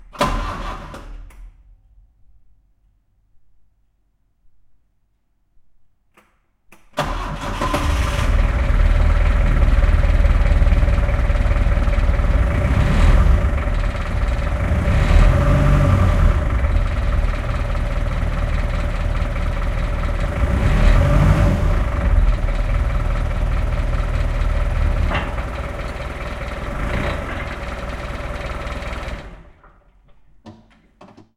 fork lift start and run